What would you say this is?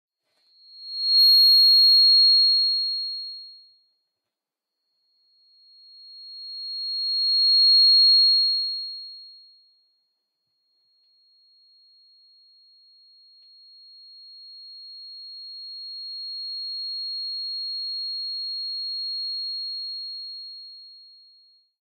Microphone feedback dry
Microphone feedback of varying lengths. Made by jamming earphone bud into the recorder's microphone with varying pressure, then cleaning out low end rumbling.
Recorder: Sony PCM-D100
artifact, malfunction, piercing, sharp, shrill